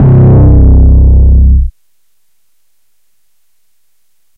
made with vital synth
bass, kick, beat, hard, drum